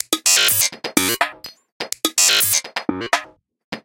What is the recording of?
BuzzyPercussion 125bpm04 LoopCache AbstractPercussion
Abstract Percussion Loop made from field recorded found sounds
Loop, Abstract